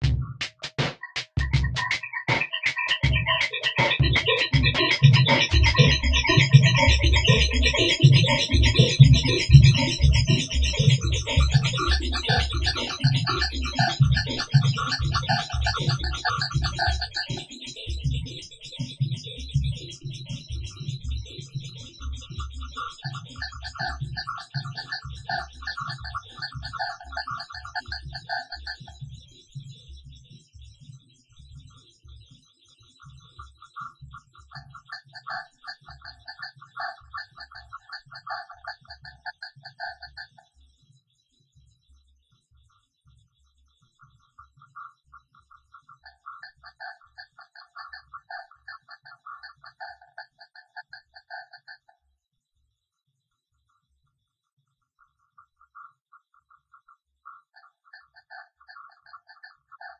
DISTOPIA LOOPZ 042 80 BPM
DISTOPIA LOOPZ PACK 02 is a loop pack. the tempo can be found in the name of the sample (60, 80 or 100) . Each sample was created using the microtonic VST drum synth with added effects: an amp simulator (included with Cubase 5) and Spectral Delay (from Native Instruments). Each loop has a long spectral delay tail and has some distortion. The length is exactly 20 measures at 4/4, so the loops can be split in a simple way, e.g. by dividing them in 20, 10 or 5 equal parts.
bpm, delay, distortion, loop, rhytmic